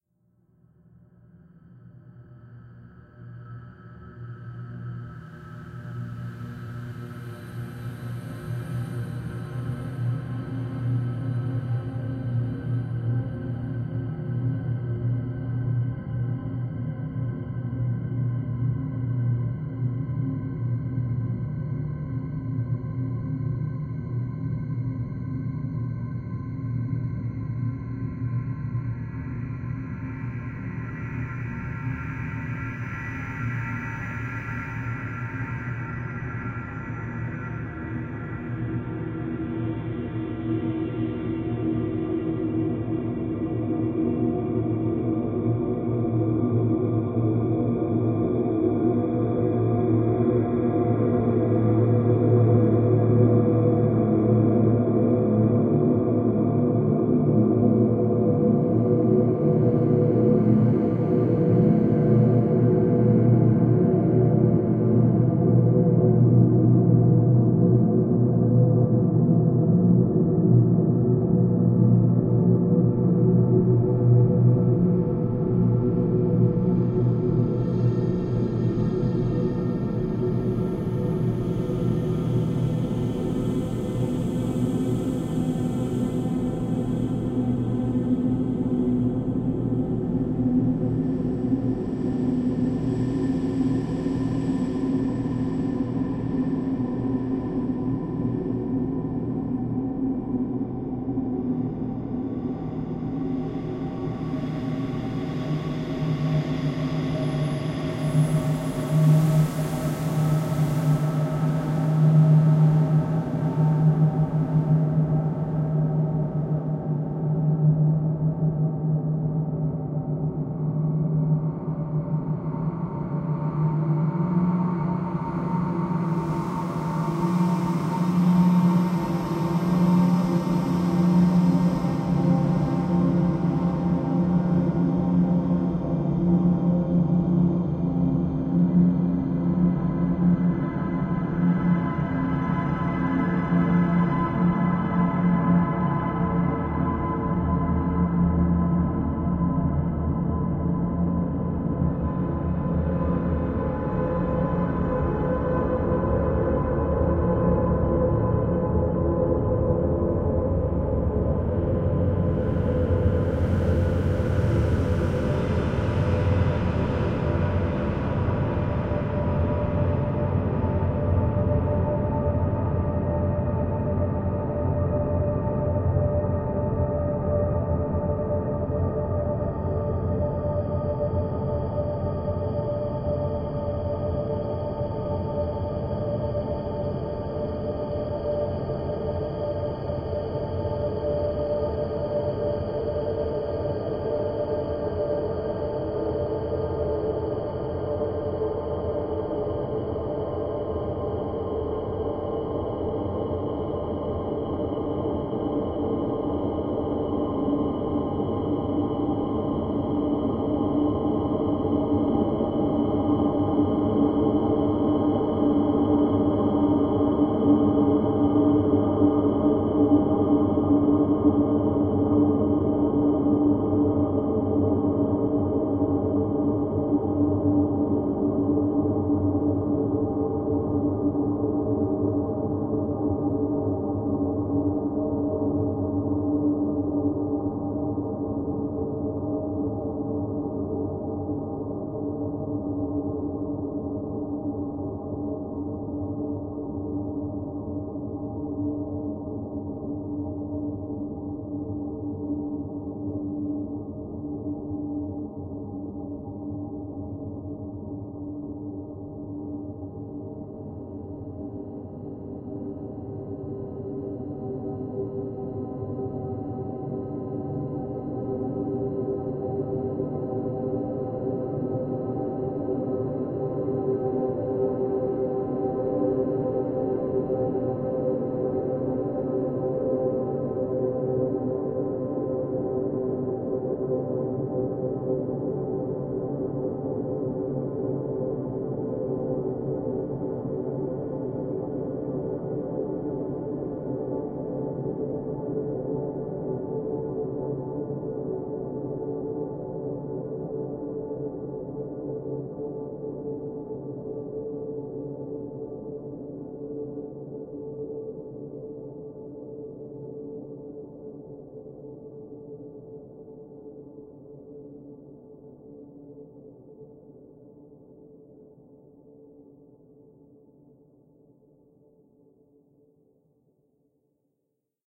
Dark Ambient 024
soundscape, terror, atmo, ambience, tenebroso, film